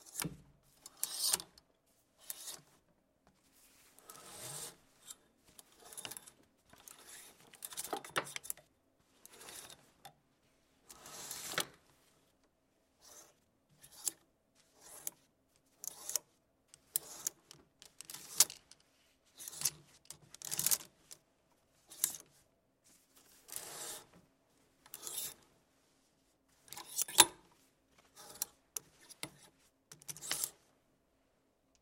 Cloths hangers sliding
Sliding cloths hangers in a closet
hangers, cloths, sliding, inside, closet